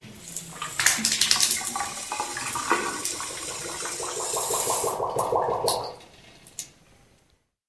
water-and-blowholes
Another hotel's bathroom recording. Flow of water and blowholes.